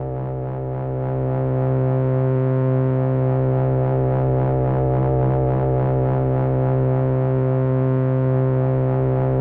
heavily processed sounds form the symbiotic waves module by pittsburgh modular. Filtered through the Intellijel Atlantis Filter. Effects were minifooger chorus and occasionally strymon delay or flint. The name give a hint which oscillator model and processor were used.
analog, analogues, chorus, eurorack, hybrid, pad, paradise, pittsburgh, strymon, symbiotic